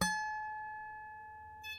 lap harp pluck